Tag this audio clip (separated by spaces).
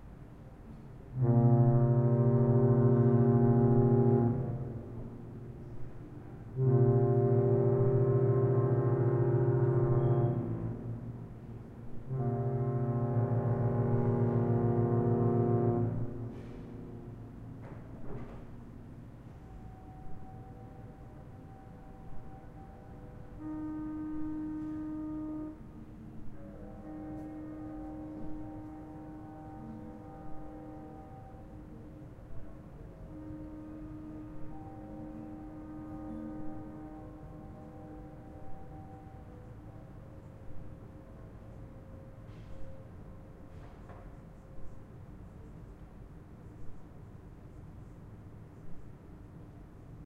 mary hamburg harbour queen night queen-mary-2